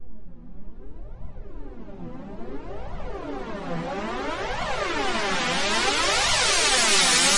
FX Uplifter 100
Trance, house, electro..
uplifter, short, trance